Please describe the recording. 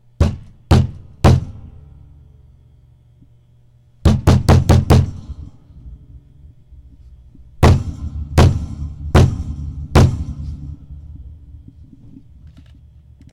Desk Pound
Pounding a desk with my fist. Pretty Simple.
impact, heavy, pound, hit, desk, desk-pound, thump